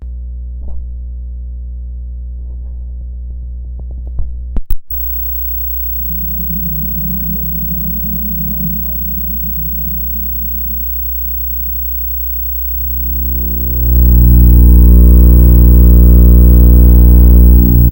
tv contact
Turning on a TV while a contact mic was taped to the screen. There is a lot of hum I think because there was power already in the TV, which was on standby. Once the TV was turned on, I turned down the volume all the way, which strangely resulted in the very loud noise at the end.